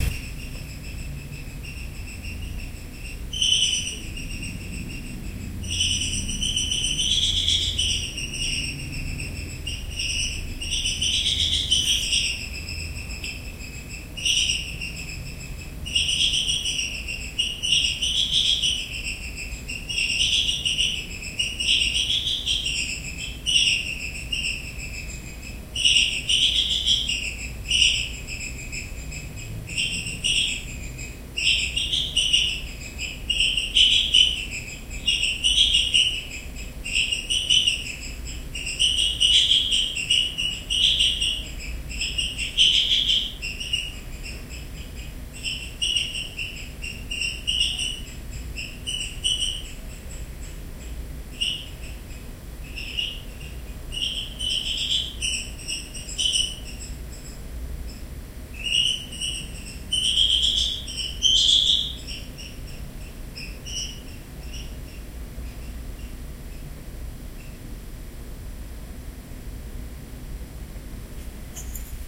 Some cacomixtles (ringtail) make their characteristic noise at night, in the suburbs of Mexico City.
Sennheiser Ambeo Smart Headphones, Noise reduction and normalization on Audacity.